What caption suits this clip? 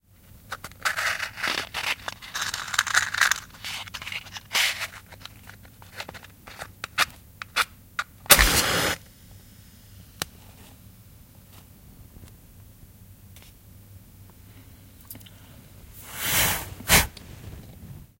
20170530 wooden.match.01
Extracting a wooden match from a box, igniting, then extinguishing (blowing).Sennheiser MKH 60 + MKH 30 into Shure FP24 preamp, Tascam DR-60D MkII recorder. Decoded to mid-side stereo with free Voxengo VST plugin
spark, light, match, matchstick, matches, fire, candle, lighter, matchbox, ignite, cigarette, burning, ignition, flame, gas